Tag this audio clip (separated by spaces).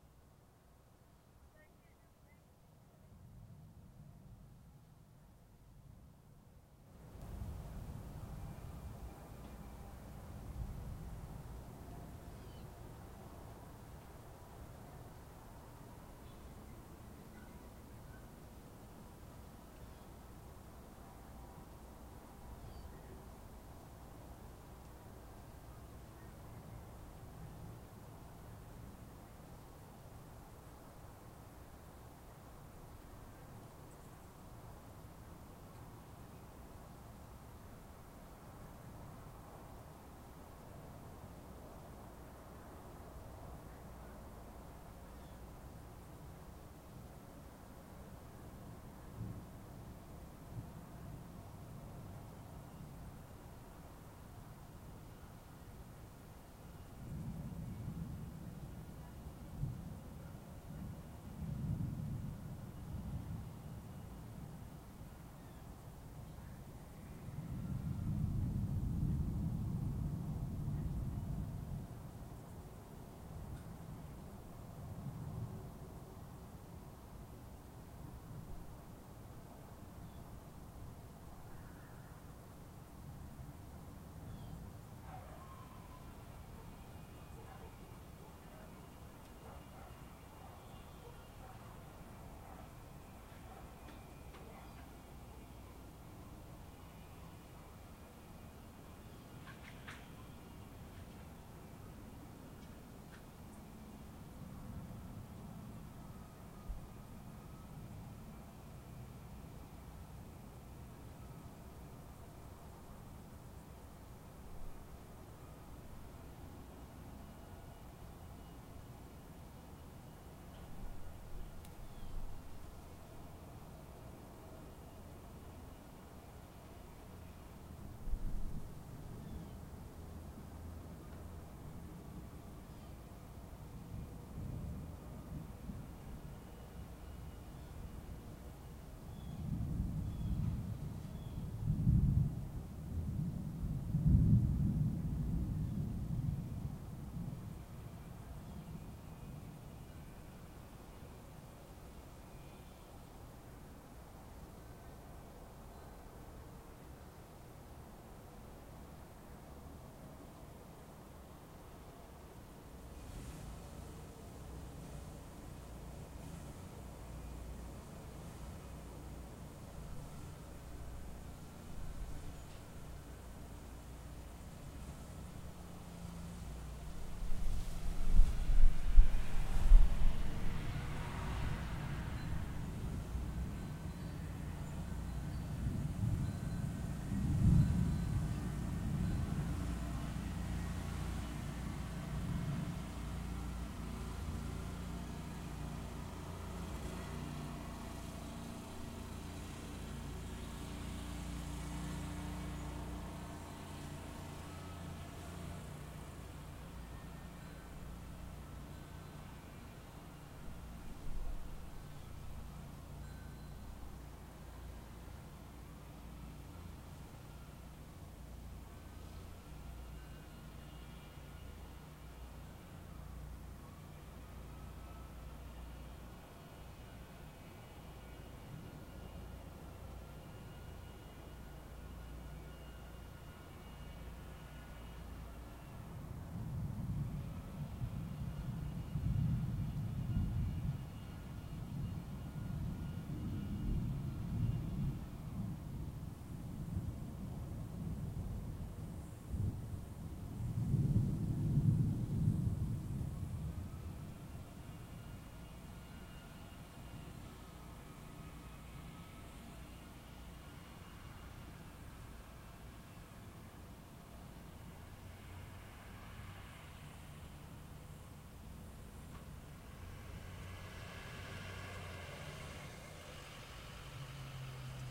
ambience patio thunder